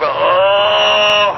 First step on process of mangling sounds from phone sample pack. Noise reduction added.